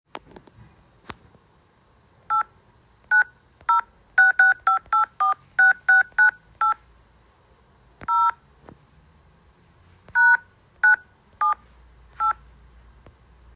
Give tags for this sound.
mobile; numbers; phone; telephone

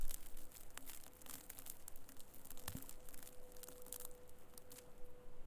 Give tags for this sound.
crackle; stones; natural

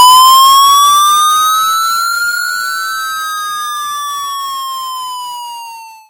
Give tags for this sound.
siren; fireman; emergency

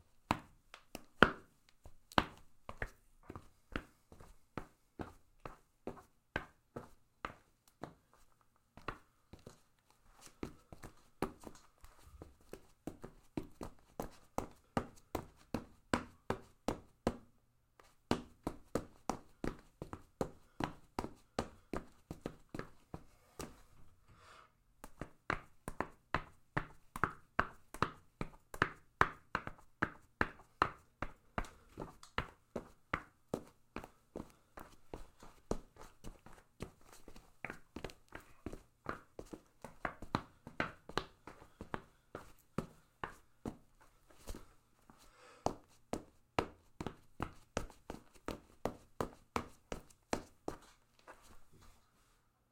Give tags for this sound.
Footsteps; hardfloor; tile

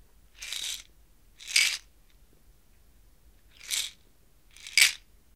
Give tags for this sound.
motion; pills; rattle; rattling; shake; shaken; shaking; shook

